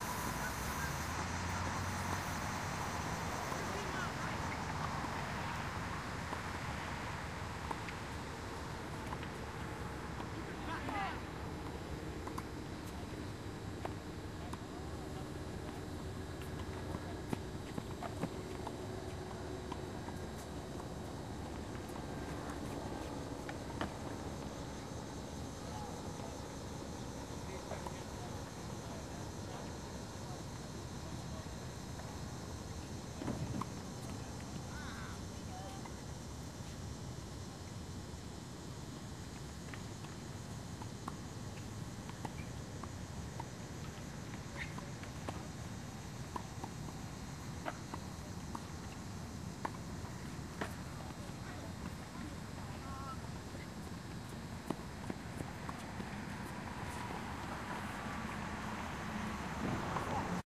Background sound of people playing tennis, with cicadas and city traffic. Recorded in Grant Park in Chicago. Equipment: Zoom H4N, 80Hz low cut and general limiter enabled.
city, background, ambient, tennis